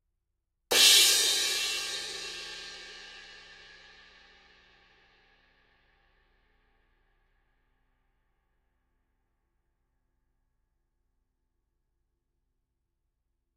Zildjian 18" Dark Crash Harder Hit